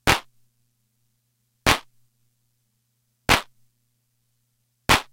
1983 Atlantex MPC analog Drum Machine clap sound